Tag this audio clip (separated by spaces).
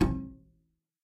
Stereo Plucked Bass Standup Upright Instrument Acoustic Double